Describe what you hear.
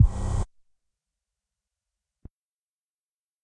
Viral Granualized BD 03
Abstract, Noise, Industrial